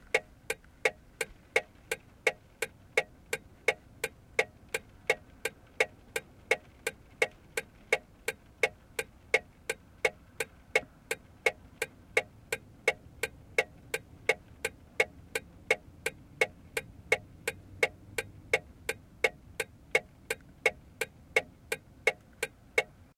Hazard Lights - 2012 Toyota Tacoma
Toyota Tacoma (2012) hazard lights recorded on a Marantz, with a Sennheiser shotgun mic, from inside the truck cab.